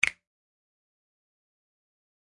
Real Snap 12
Some real snaps I recorded with an SM7B. Raw and fairly unedited. (Some gain compression used to boost the mid frequencies.) Great for layering on top of each other! -EG
finger, finger-snaps, percussion, real-snap, sample, simple, snap, snaps, snap-samples